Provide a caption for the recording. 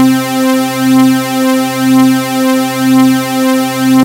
Synth Orchestra 3
This was made the same way as "Synth Orchestra 1" and "Synth Orchestra 2". (aka. combing a bunch of synth samples together.
synth-lead, electronic, synthesizer-lead, saw-wave, analog, sawtooth, synthesizer, synth-pad